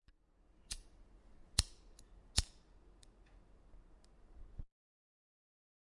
The sound of a lighter, recorded with ZOOM, no fx!